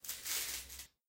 foley for my final assignment, an electric blast or what i THINK could be an electrical blast with some filters